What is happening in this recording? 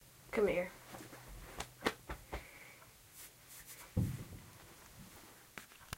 Includes a verbal "Come 'ere" at beginning. Recorded with a black Sony digital IC voice recorder.
appreciation, love, hug, affection, pat-back